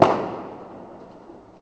Cut of a firework
explosion, firework